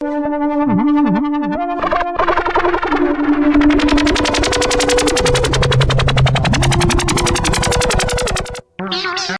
Ufo Ray Gun Space Star Trek Wars Electronic Synth Theremin
"Raiders of the Lost Clam!!!" uses freesoundorg sounds A classic 50's style sound clip with a few places to splice it up if you need sections. Something like a theremin with some space interference.
sci, fi, astronomical, horror, ambience